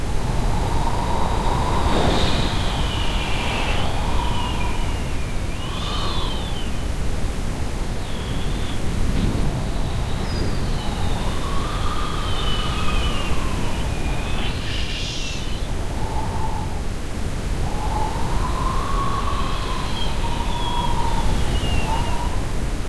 A classic wind sound made with Audacity, generated some brown noise, applied a script to modulate the volume and added some sounds with my mouth ^ ^